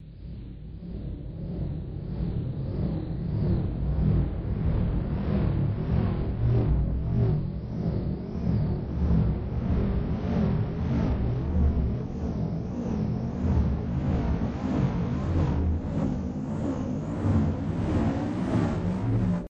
engine trouble1
Aliens need a tow truck
engine, motor, weird, noise, spaceship, laser, aliens